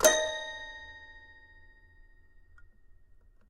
Toy records#21-D#4-03
Complete Toy Piano samples. File name gives info: Toy records#02(<-number for filing)-C3(<-place on notes)-01(<-velocity 1-3...sometimes 4).
instrument, keyboard, piano, sample, samples, toy, toypiano